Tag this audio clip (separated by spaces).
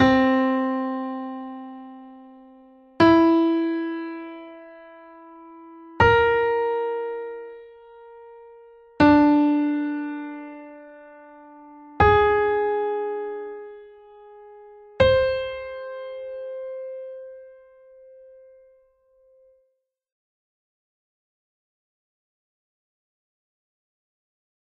aural sixth pentacle symmetry